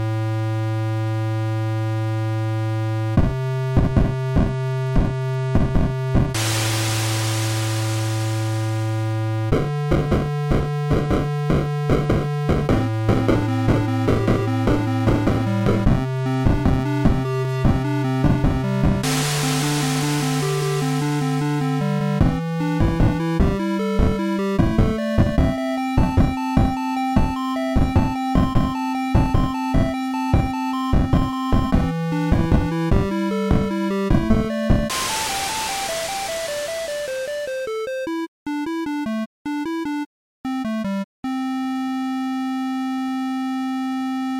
Pixel Song #11
free, Music, Pixel